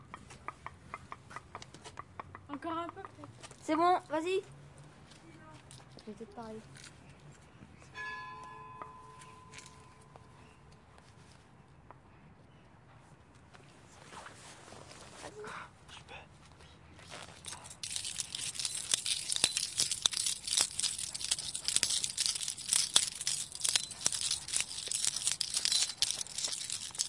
SonicSnaps-IDES-FR-keys
Keys jangling with some talking at the beginning .
France, IDES, keys, Paris